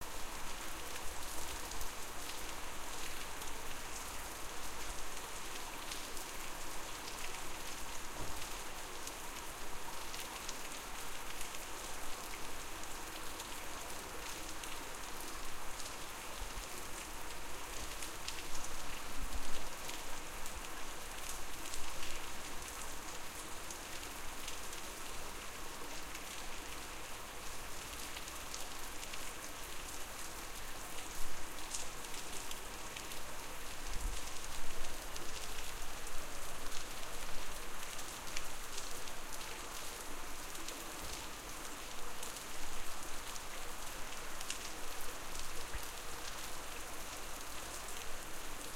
Regen Rain LOng Lang Tropfen Stark Strong1-3
Tropfen, Stark, Rain, Strong1-3, LOng, Regen, Lang